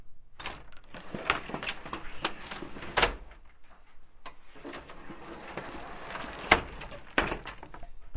My friends sliding closet door